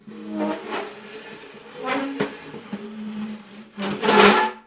chaise glisse6
dragging a wood chair on a tiled kitchen floor
furniture, floor, wood, tiled, chair, squeaky, dragging